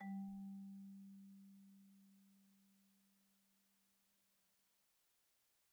Sample Information:
Instrument: Marimba
Technique: Hit (Standard Mallets)
Dynamic: mf
Note: G3 (MIDI Note 55)
RR Nr.: 1
Mic Pos.: Main/Mids
Sampled hit of a marimba in a concert hall, using a stereo pair of Rode NT1-A's used as mid mics.
marimba, sample, wood, idiophone, percs, mallet, hit, one-shot, pitched-percussion, percussion, organic, instrument, orchestra